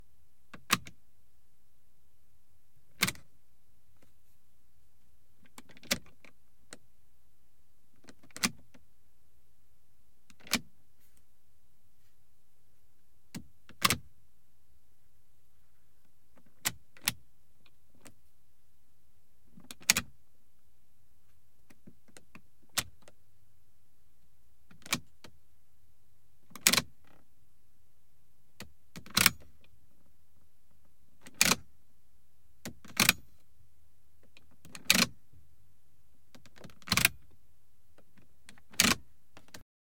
The HVAC fan speed selector on a Mercedes Benz 190E, shot with a Rode NTG-2 from 2" away.